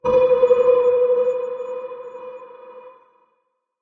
dark movement3
dark, fx, tone